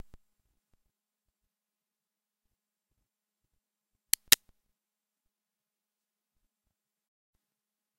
cocking dragoon
Audio of a dragoon pistol being cocked. It was probably an Italian copy of an American-made unit. May require some trimming and buffing. I think I recorded this with an AKG Perception 200 using Cool Edit -- and I did it to get my room-mate to leave (after we recorded the sounds of several of his guns and a sword .